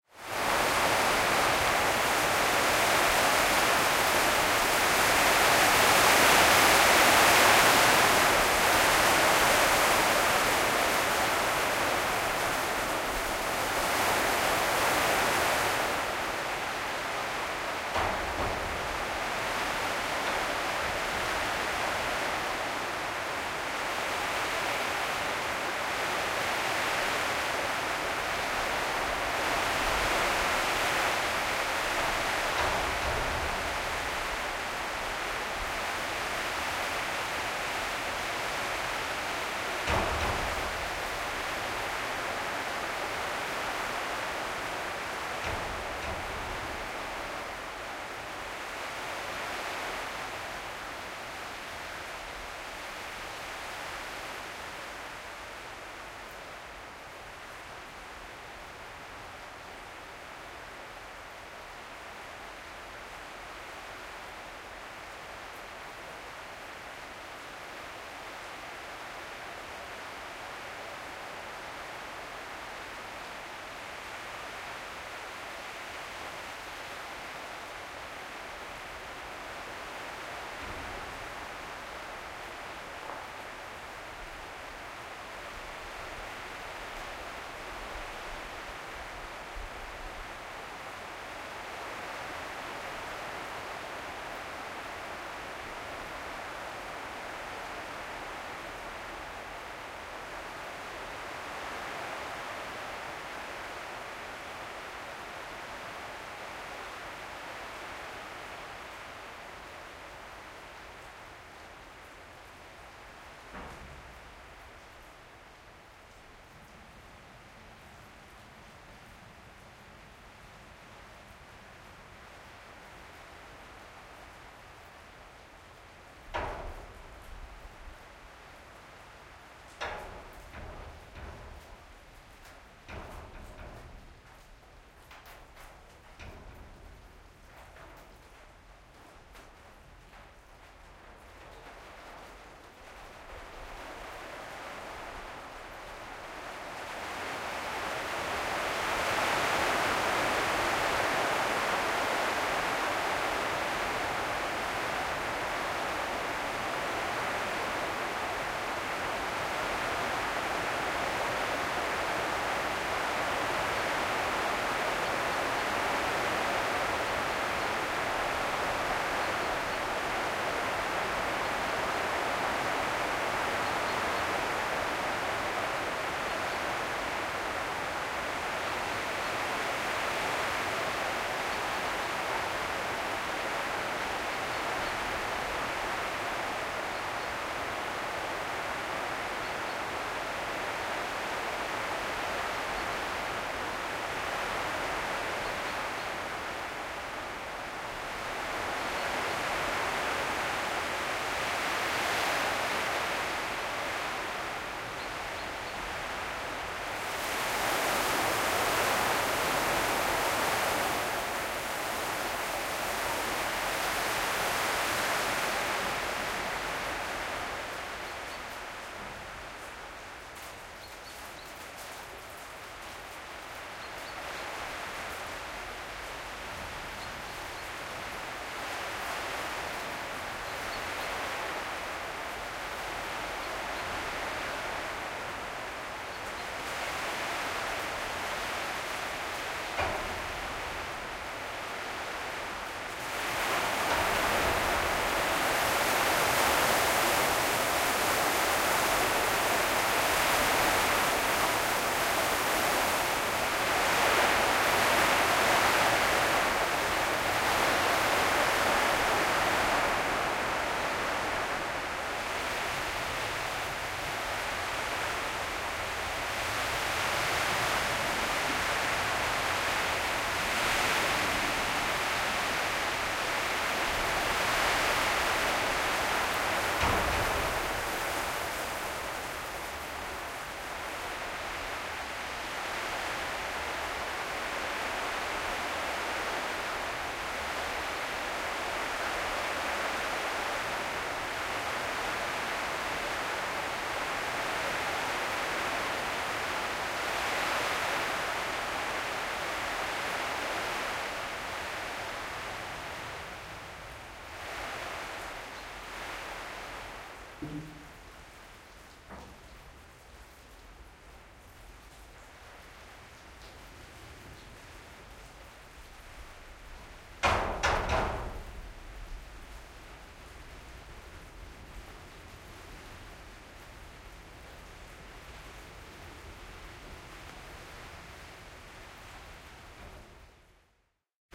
Rain on Corrugated Iron
A stereo field recording of heavy rain showers on a steel framed,galvanized corrugated iron, clad building. A gate high in the entrance clangs with the wind as well. Sony PCM M10.
rain, zinc, tin, galvanized, steel, corrugated-iron, tin-roof, roof, field-recording